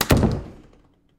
Bedroom wooden door close mic-ed mono - Post Squeak no knocks
A wooden door closing
close, closing, creaking, door, opening, rusty, squeaky, Wooden